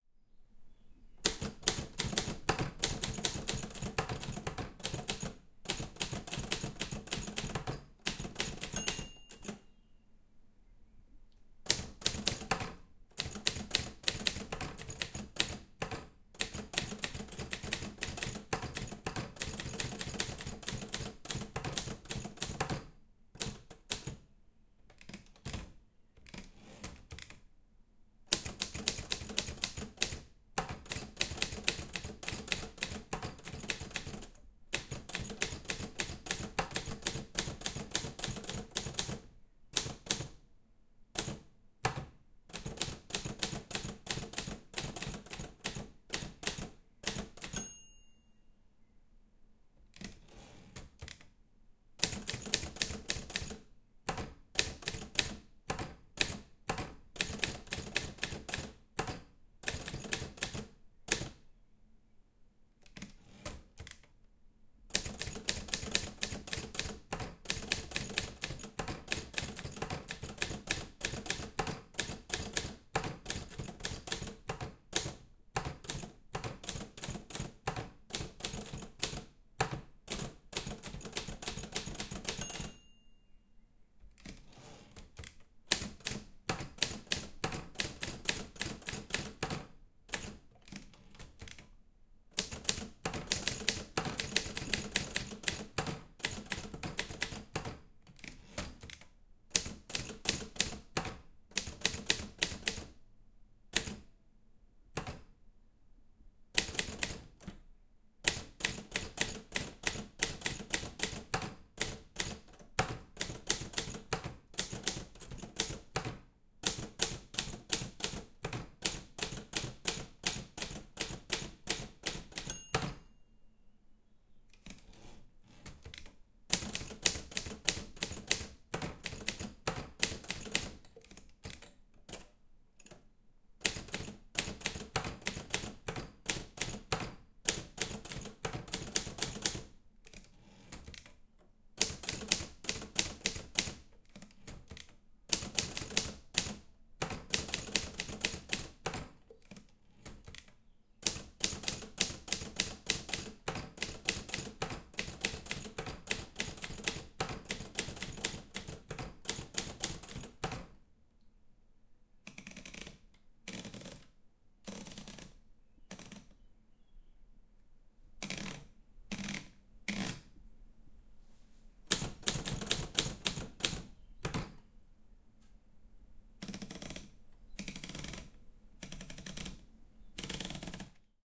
Sound of me typing on my old typewriter (the model is Hermes 3000) ranging from fast-paced typing to single letters, the margin bell ringing, scrolling the paper up and down... Recorded on my Zoom H5 Stereo Mic